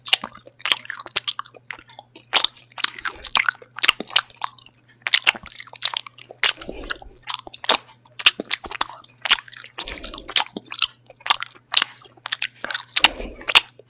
Lip Smacking Sound
A recording of someone eating some gum very loudly
gum, lips, mouth, chewing, smacking